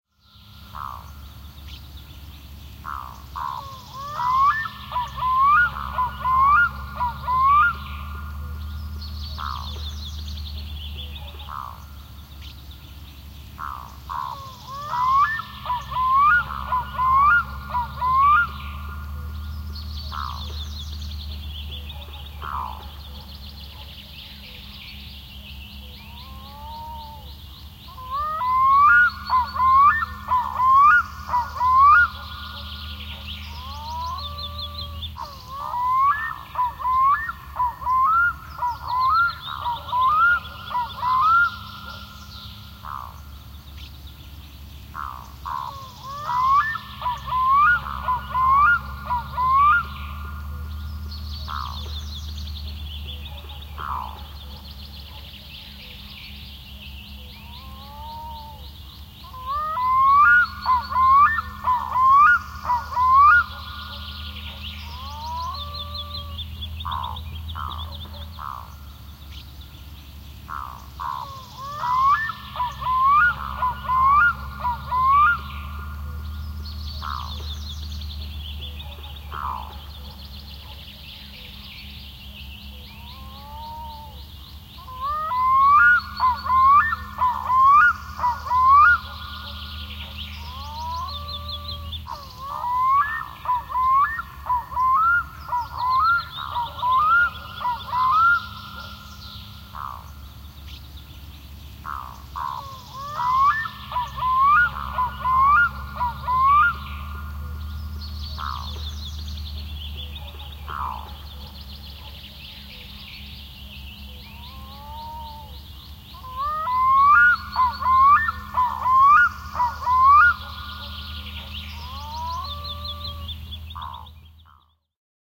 Kuikka / Black-Throated Loon / Arctic Diver
Kuikan huutoja kaukana, korahduksia. Taustalla pikkulintuja, hakkaamista ja kaukaista kuminaa.
Paikka / Place: Suomi / Finland / Puruvesi
Aika / Date: 05.06.1993